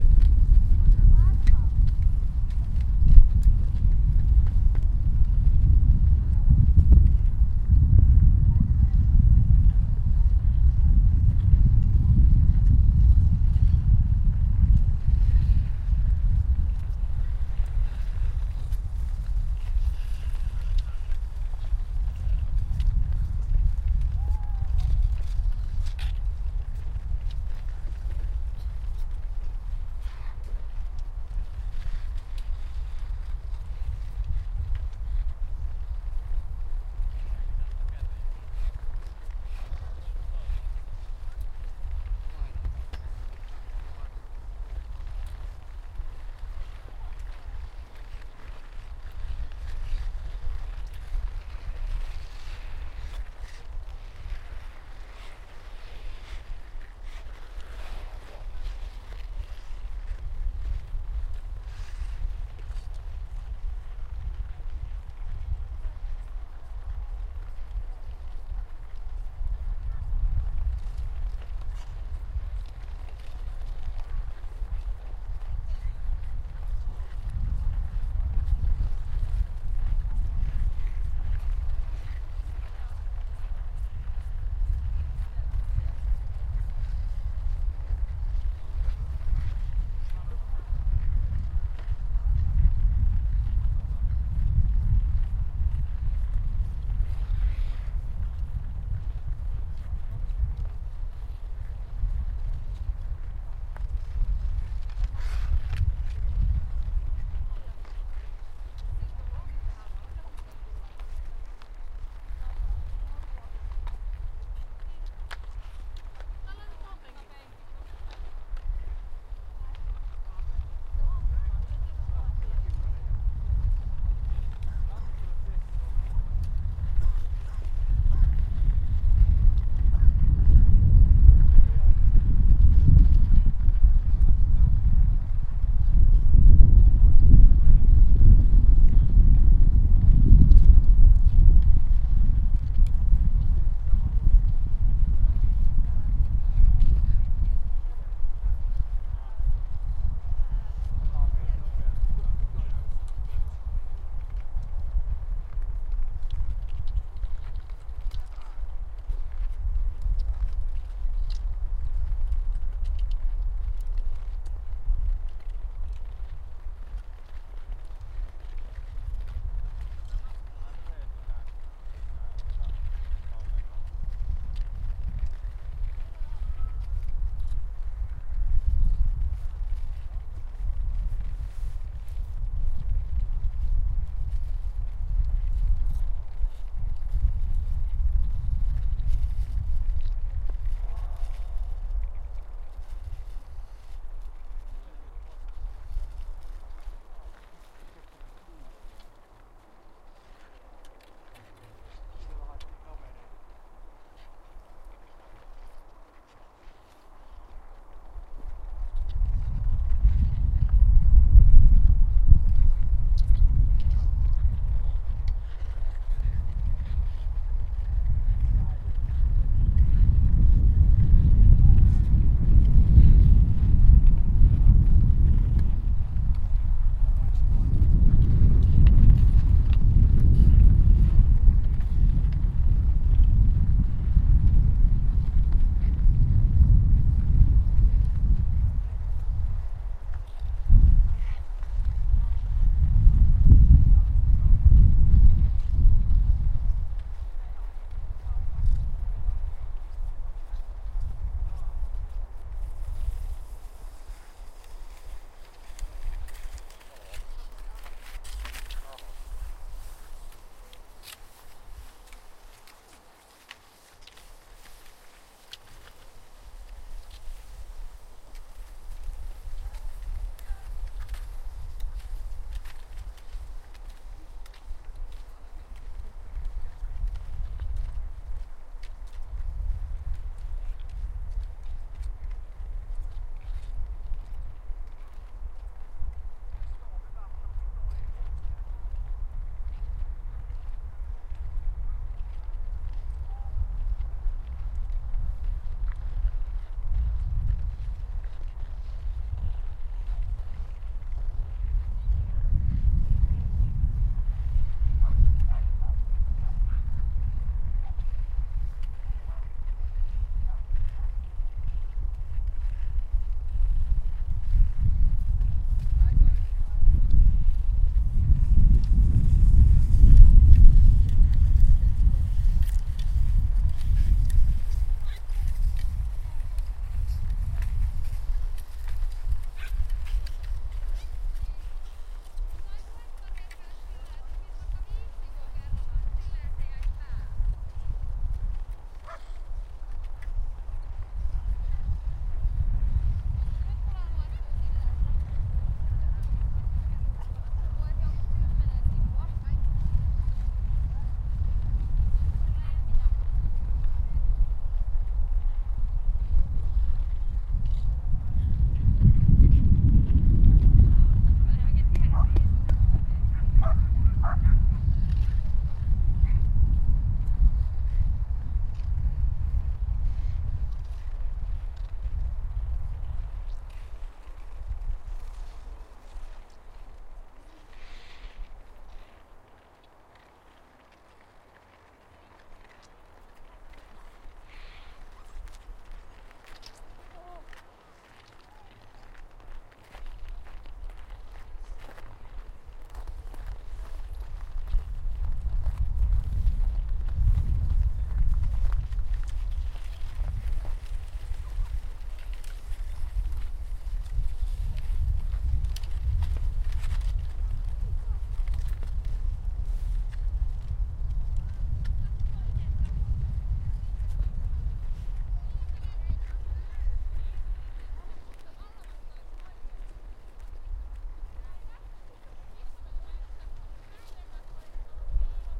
Rumbling wind & ice skating
A recording made on the ice of a small local lake. Heavy rumble of the wind interspersed with sounds of people skating, skiing and chattering away merrily. A barking dog appears at one point. Recorded with a Zoom H1.
people, ice, winter, field-recording, snow, skiing, skating, wind